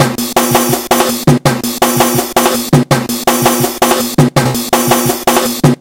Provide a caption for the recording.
drum-loop reconstruct with vst slicex (fl studio) and cut final sample with soundforge 7